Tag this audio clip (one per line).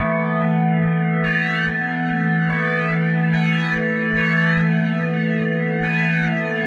chord
deep-house
nu-disco
house
beat
hard
kick
loop
rhytyhm
dance
soundesign
programmed
hihat
electro
bass
drum-bass
club
drum
producer